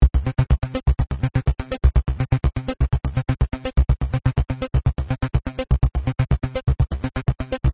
Groove 6-Audio
Made in Ableton with various synths and effects. groove synth fat layer beat phat 124bpm
groove, ultra, kick, dance, 6, disco, drum, club, beat, loop